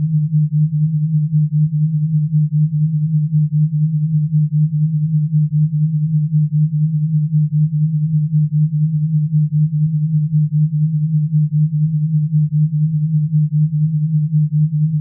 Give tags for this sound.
energy; power

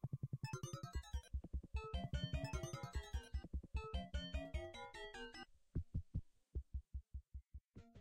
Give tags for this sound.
bells; chimes; circuss; happy-accident

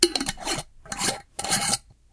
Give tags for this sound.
close; drink; closing; bottle; lid; cap; soda

